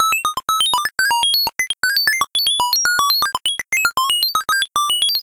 SciFi, Beeping Technology 03
SciFi, Beeping Technology
This sound can for example be used for robots - you name it!
sci-fi, computer, droid, android, beeping, robotics, electronics, robot, data, information, tech, technology, high-tech, beep, robotic